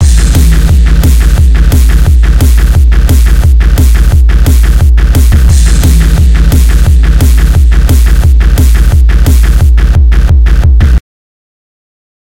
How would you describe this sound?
Made in LMMS. Has a speedstep vibe to it and it's loopable. Have fun with it. :p